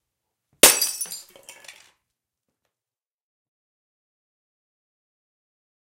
Jar breaking
A jar breaks.
break, jar, jar-breaking